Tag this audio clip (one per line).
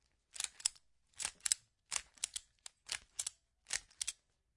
latch
tow